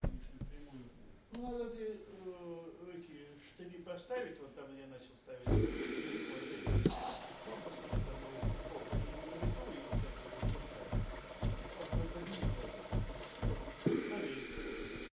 Empty Coffee Machine
Sound of faulty operation of coffee machine with empty water tank.